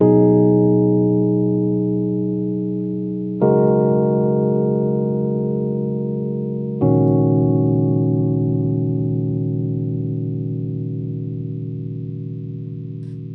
Hey! Three chords played on my Rhodes. Rhodes to Janus 1 amp mic'd with Sennheiser 8060 into Apogee Duet recorded with Reason.
I wish I could make up a better description but I'm tired.